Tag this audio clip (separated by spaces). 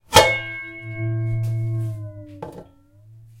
bell bell-set bell-tone bong ding dong doppler hit impact ping ring shift swipe tone water